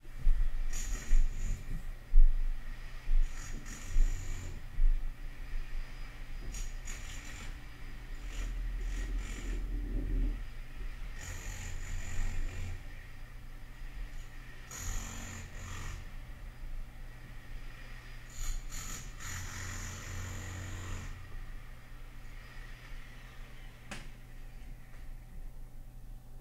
Urban - Jack Hammer / Cement Drilling
Plumbers were jack hammering through cement outside of my apartment door to fix the neighbor's pipes so I pressed record. Sound includes the jackhammer operating and then cycling off.
urban, construction, city, drilling, jackhammer